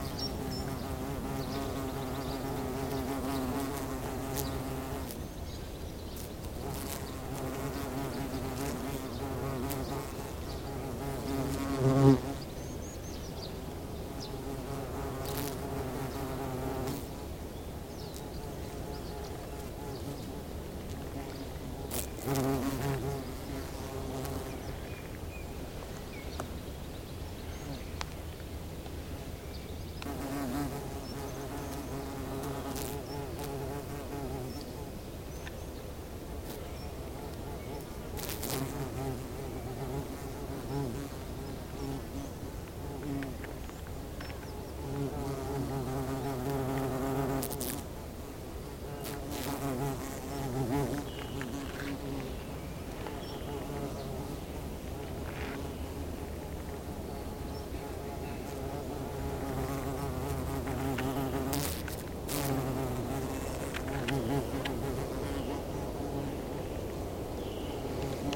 20060518.ground.nesting.bee
buzzing of a solitary bee near her nest (a burrow in the sand), with some birds in background. Don't let the sound fool you, the insect is less than 10 mm long! Sennheiser K6-ME62 iRiver H120 / zumbido de una abeja solitaria en los alrededores de su nido, algunos pajaros al fondo
flickr, nature, bee, insects, field-recording, spring